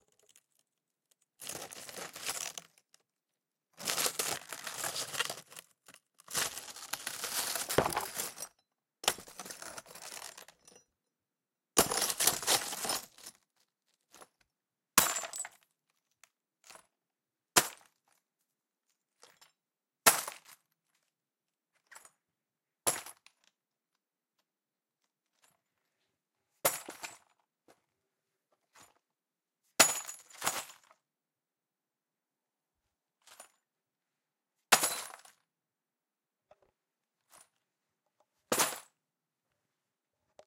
A brick is dropped 5ft into glass on a cement floor. The brick is then ground against the glass